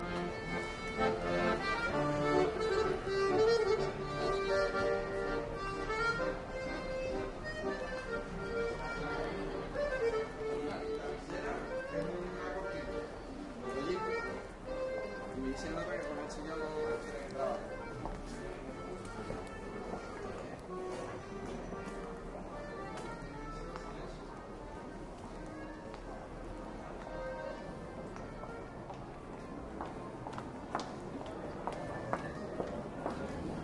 an accordionist performs in Jose Gestoso st(Seville), voices in background. R09 with internal mics
ambiance, city, field-recording, street-musician, streetnoise